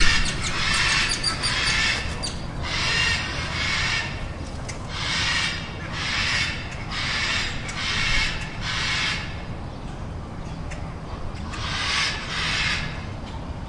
blue and yellow macaw
Loud calls from a Blue-and-yellow Macaw, with other birds and some wing sounds. Recorded with a Zoom H2.
birds, zoo, parrot, squawk, exotic, aviary, bird, macaw, field-recording, tropical